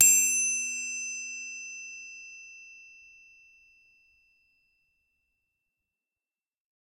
Basic triangle sample using wooden mallet.
Recorded using a Rode NT5 and a Zoom H5.
Edited in ocenaudio.
It's always nice to hear what projects you use these sounds for.